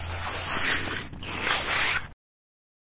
Bastion Ballast Pure Facade Skein
The reproduction of the social can be read as and through sound.
Recorded with a tascam dr100mkii and a rode shotgun mike. A door opens in the Student Services building at UC Santa Cruz.
field-recording, urban